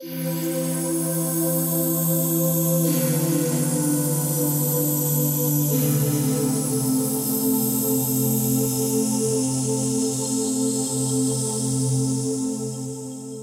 Live Krystal Cosmic Pads